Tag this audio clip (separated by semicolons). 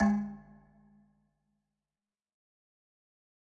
drum; pack; timbale; god; home; kit; trash; record